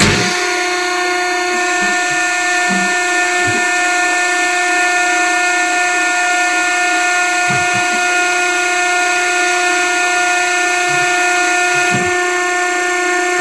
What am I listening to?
Dumpster Pressing 3
(CAUTION: Adjust volume before playing this sound!)
A short segment of the "Dumpster_Press_2" sound rendered as a separate clip for editing purposes.